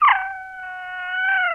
Alien/ alien animal baby crying in moderately high pitch with trills.